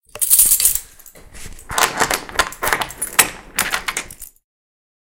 opening Padlock
close, closing, door, gate, key, keys, lock, locking, open, opening, padlock, shut, squeak, unlock, unlocking, wood, wooden